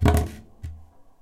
Scraping a plank. Recorded in Stereo (XY) with Rode NT4 in Zoom H4.